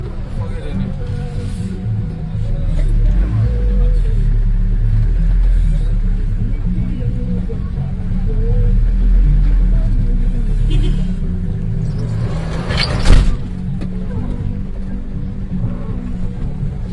public transport van door slam in Banjul (Gambia)Recorded with my portable DAT recorder using a pair of Soundman OKM-II headmics (specially done for binaural listening You can hear first the music of the stereo in the van and then the door slam.